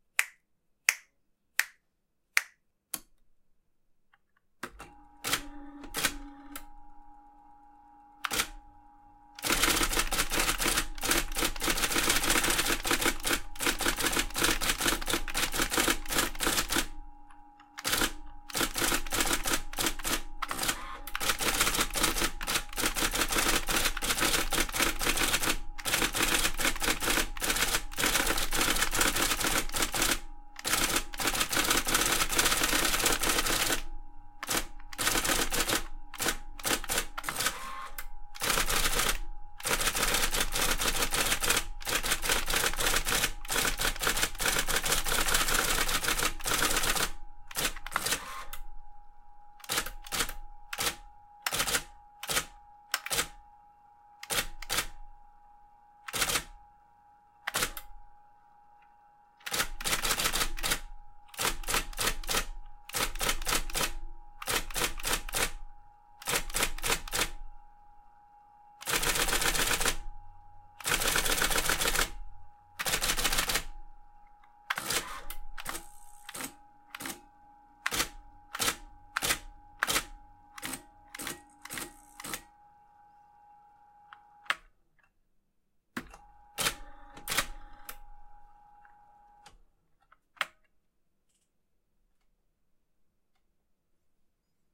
Typing sounds from my IBM Electric Typewriter Model 85 (the 65 and 95 will sound the same, typing mechanism and keyboard are identical between machines) with the typewriter turned on, first 4 snaps are my snapping my fingers followed by flipping both power switches and beginning typing. There are also sounds of the typing element and carriage moving in this recording as well.